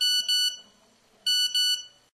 text message
Standard cell mobile phone sound for incoming SMS message
message, mobile, phone, sms